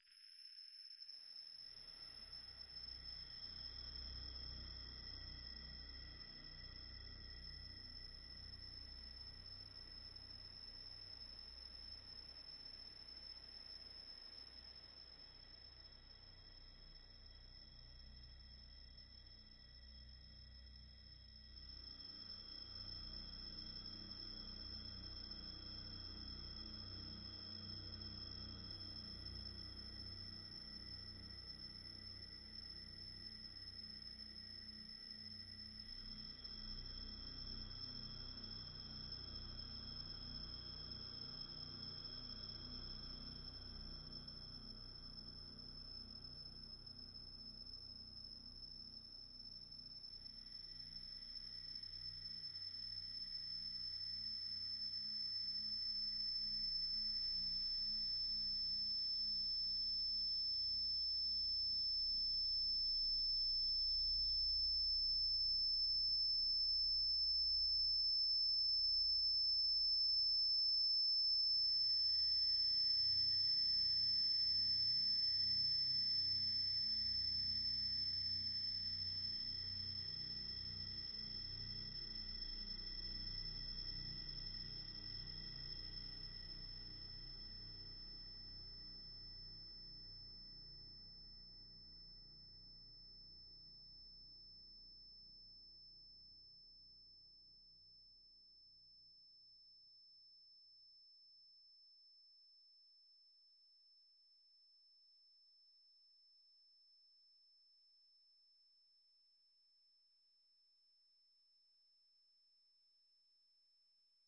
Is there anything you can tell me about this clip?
LAYERS 016 - METALLIC DOOM OVERTUNES-125
LAYERS 016 - METALLIC DOOM OVERTUNES is an extensive multisample package containing 128 samples. The numbers are equivalent to chromatic key assignment covering a complete MIDI keyboard (128 keys). The sound of METALLIC DOOM OVERTUNES is one of a overtone drone. Each sample is more than one minute long and is very useful as a nice PAD sound with some sonic movement. All samples have a very long sustain phase so no looping is necessary in your favourite sampler. It was created layering various VST instruments: Ironhead-Bash, Sontarium, Vember Audio's Surge, Waldorf A1 plus some convolution (Voxengo's Pristine Space is my favourite).
overtones, drone, multisample, pad, ambient